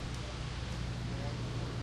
newjersey OC bw underloop
Loopable snippets of boardwalk and various other Ocean City noises.